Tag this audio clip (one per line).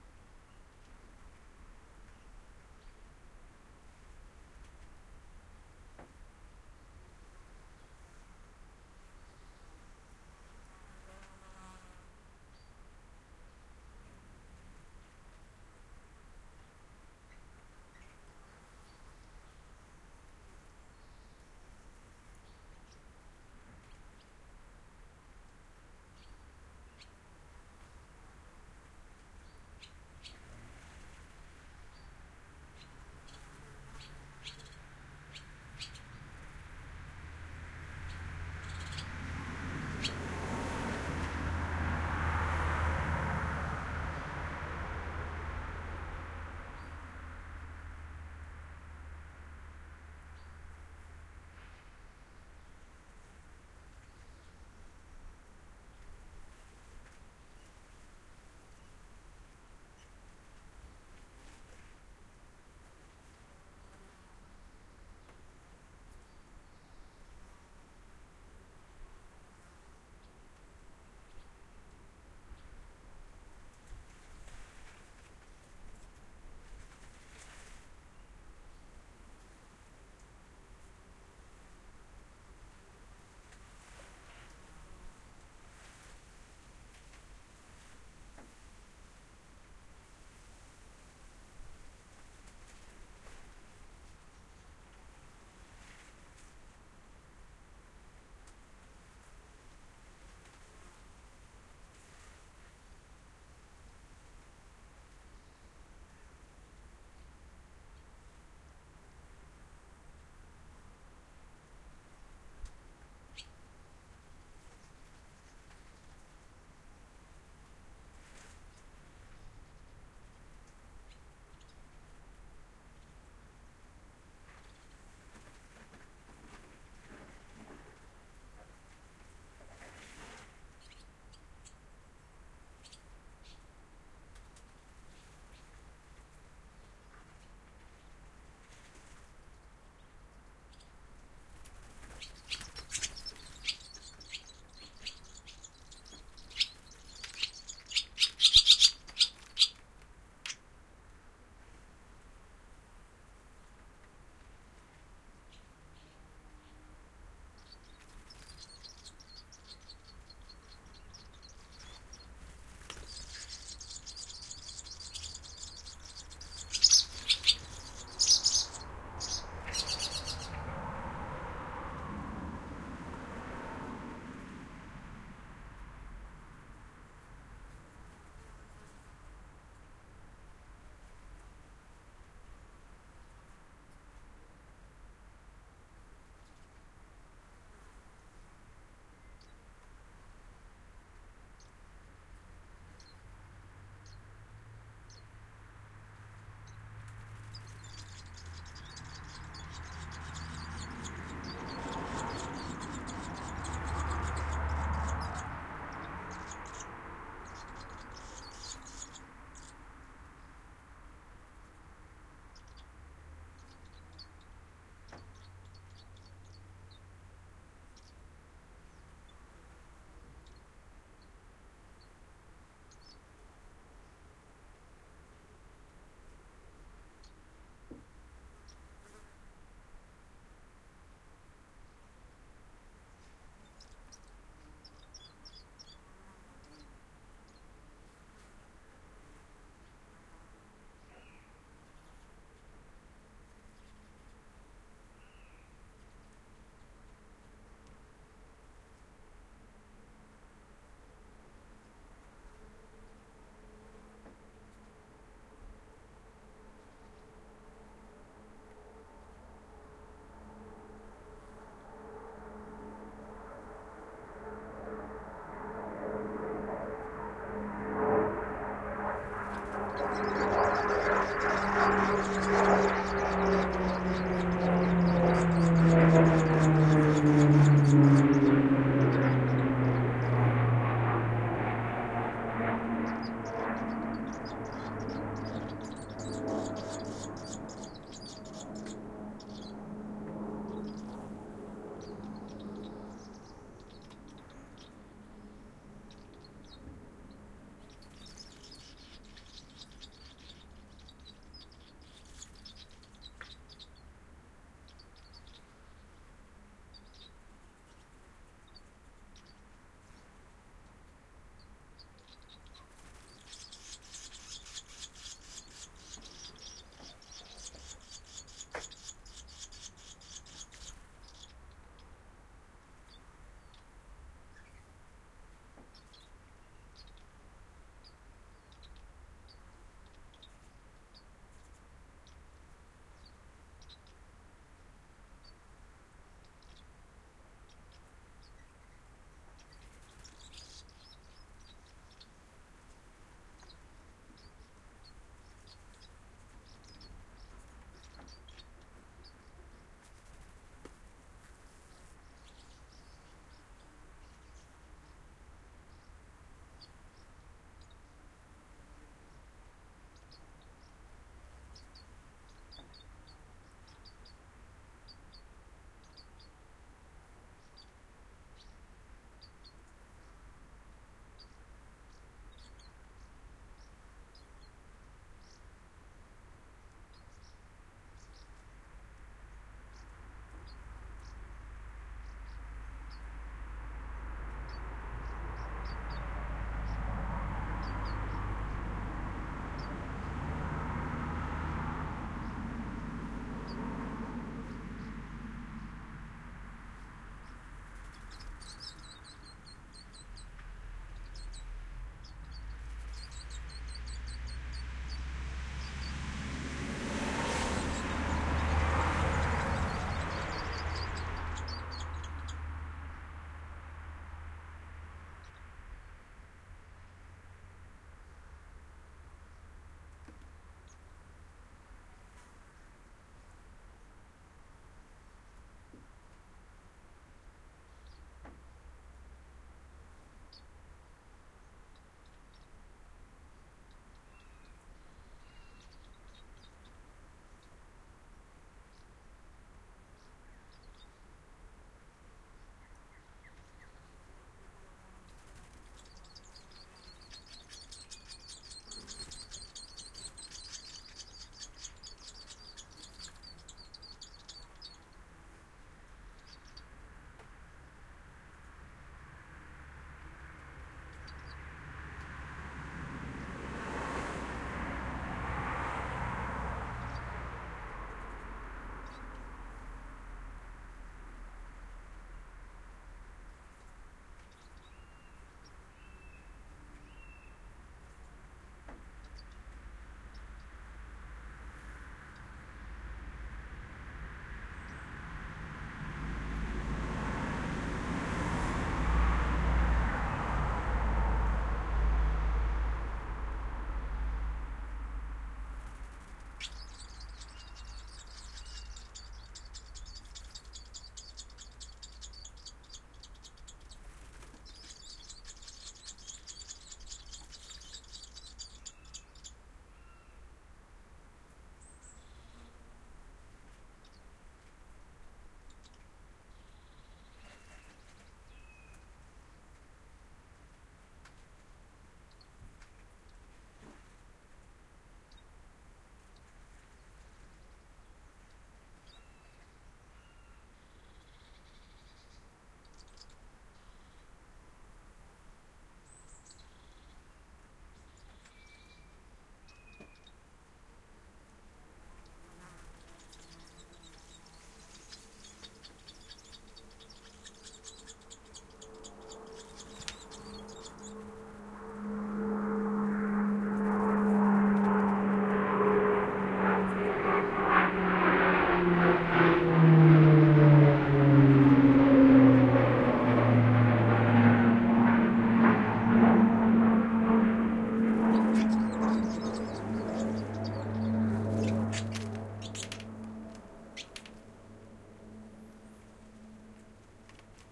countrylane
plane
athmosphere
field-recording
cars
swallows
scotland